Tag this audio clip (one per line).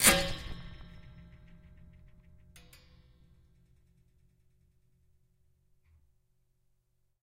acoustic
metalic
percussive
rub
scrape
spring
wood